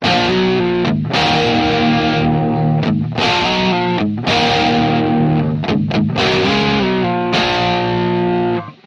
heavy guitar riff 5
Heavy metal riff created using eletric guitar.
This file is 100% free. Use it wherever you want.
chug, metal